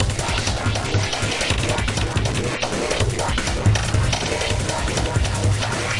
Drumloops and Noise Candy. For the Nose